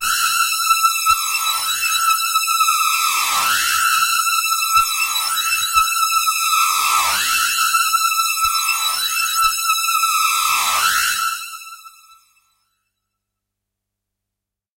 Dirty Phaser - E6
This is a sample from my Q Rack hardware synth. It is part of the "Q multi 008: Dirty Phaser" sample pack. The sound is on the key in the name of the file. A hard lead sound with added harshness using a phaser effect.